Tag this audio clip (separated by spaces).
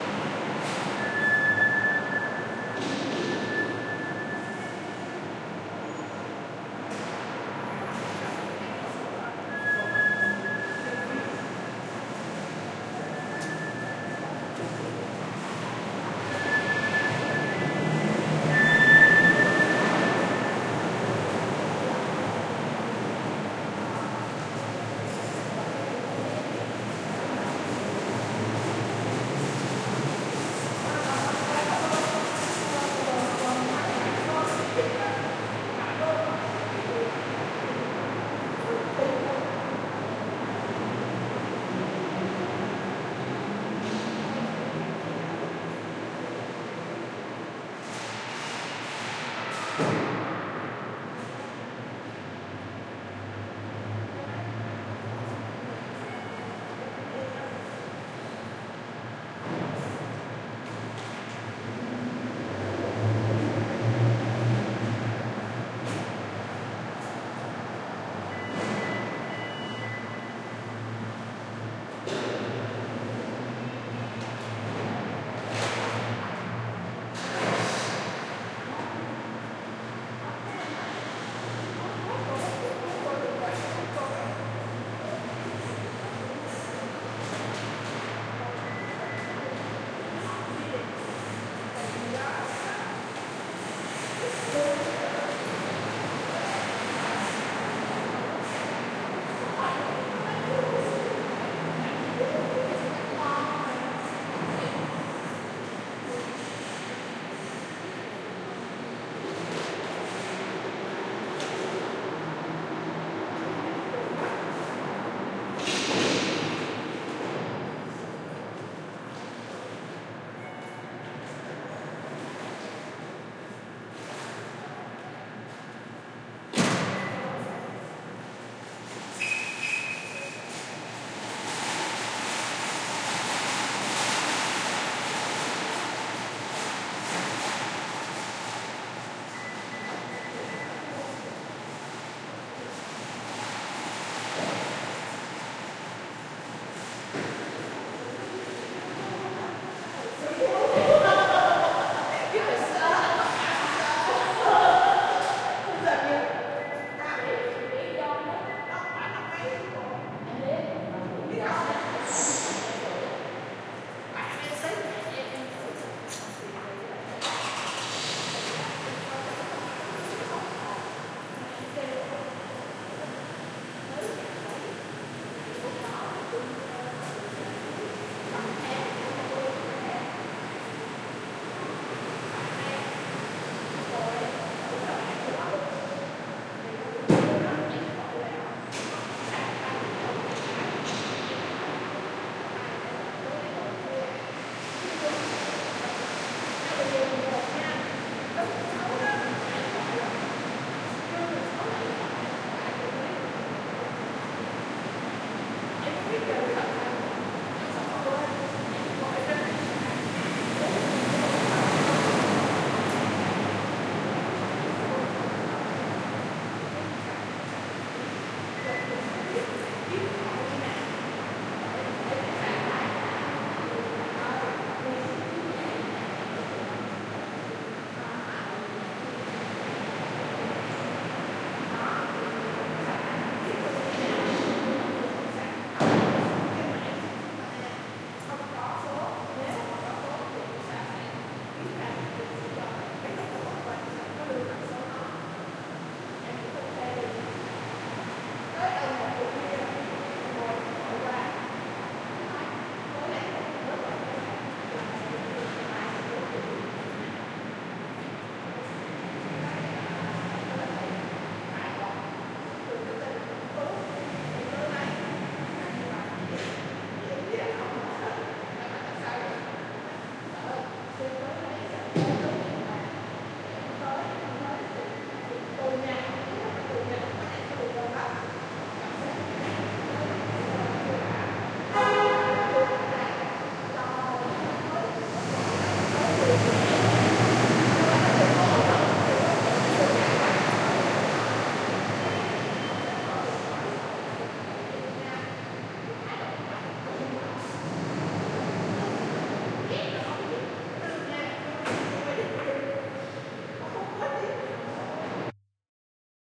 ambience echo garage people rattle reverb shopping shopping-cart wheels